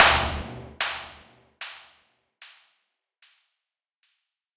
Impulse responses recorded while walking around downtown with a cap gun, a few party poppers, and the DS-40. Most have a clean (raw) version and a noise reduced version. Some have different edit versions.